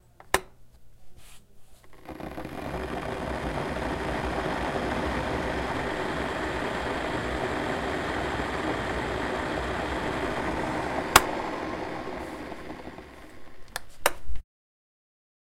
Boiling Water
dental, water